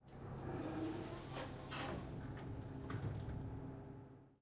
elevator door open 4a
The sound of a typical elevator door opening. Recorded at an apartment building in Caloundra using the Zoom H6 XY module.
close, closing, door, elevator, lift, mechanical, open, opening, sliding